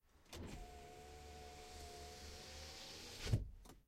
A recording of rolling up my car windows. Recorded with a ZoomH2 for Dare12.